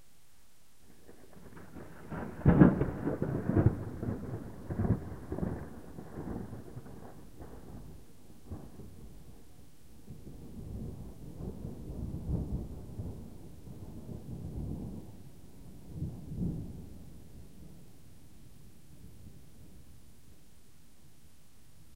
Huge thunder was recorded on 30th-31st of July, nighttime in a thunderstorm occured in Pécel, Hungary. The file was recorded by my MP3 player.
rainstorm, thunderstorm, lightning, thunder, field-recording, storm, weather